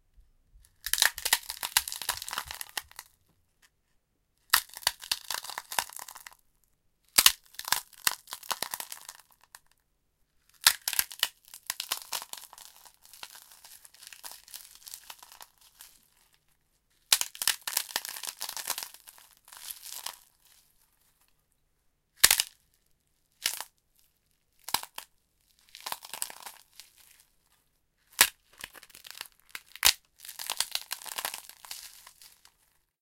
Cracking/Crunching, A
Raw audio created by crunching up prawn crackers close to the recorder. You might be able to use it for bones breaking, but it will probably need some editing on your part.
An example of how you might credit is by putting this in the description/credits:
The sound was recorded using a "H1 Zoom recorder" on 9th January 2016.